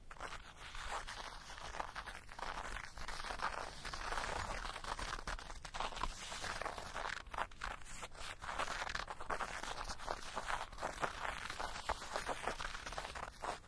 rustle.Carpet Rustle 2
recordings of various rustling sounds with a stereo Audio Technica 853A